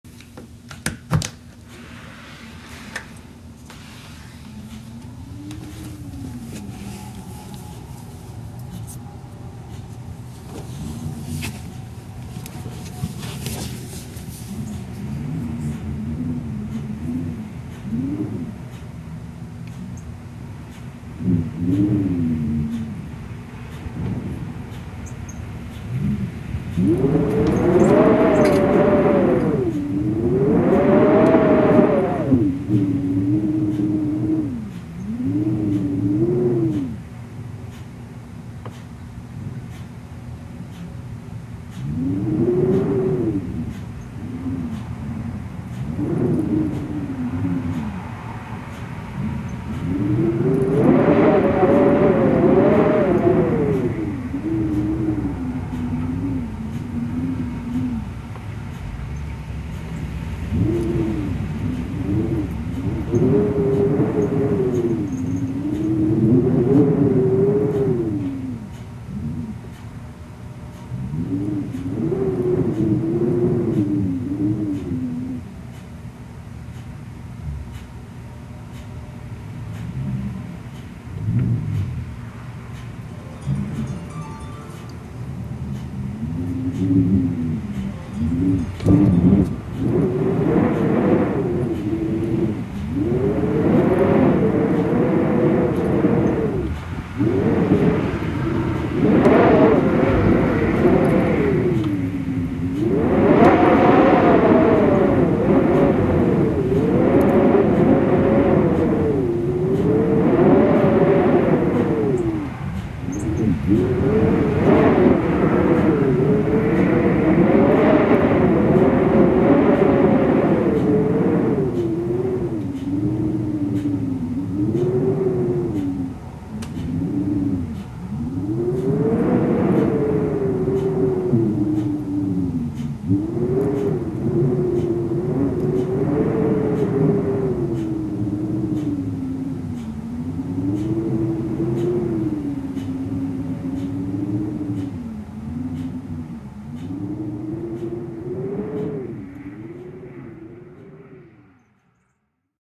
Wind in doorway
I used my ipad (gen 6) to record these eerie sounds at the front doorway. You may hear a clock in the background. Enjoy!
Wind, Weather, Windy